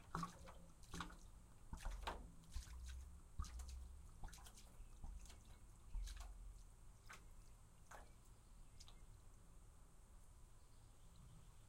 Big Liquid Gurgle Pour Slow FF207
Water, gurgle, pouring, water jug, splash, slow pour, loud, glug